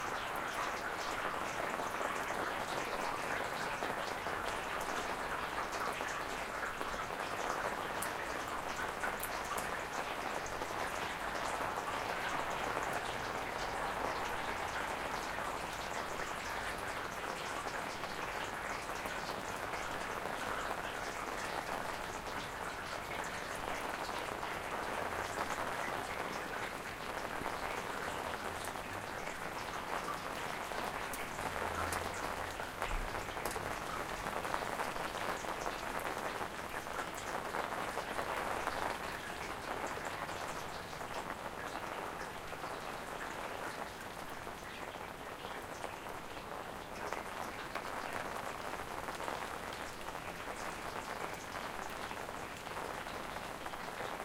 Recorded a rainy day in winter
Mic: Zoom H4n Internal Mic